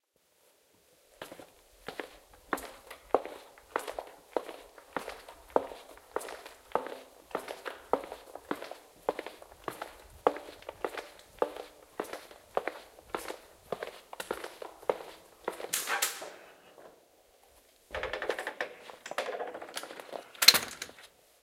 FootSteps in a Concrete Corridor 1
some foot steps in a concrete corridor.
i tried to reduce the noise a bit,
but i couldn't manage to remove it completely without losing too much of the quality.
recording equipment: zoom h4n
door-open, concrete, corridor, foot-steps, walk, paces, steps, door-shut